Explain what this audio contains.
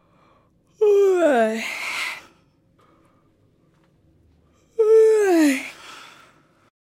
Bocejo/Efeito sonoro gravado nos estúdios de áudio da Universidade Anhembi Morumbi para a disciplina "Captação e Edição de áudio" do cruso de Rádio, Televisão e internet pelos estudantes: Bruna Bagnato, Gabriela Rodrigues, Michelle Voloszyn, Nicole Guedes, Ricardo Veglione e Sarah Mendes.
Trabalho orientado pelo Prof. Felipe Merker Castellani.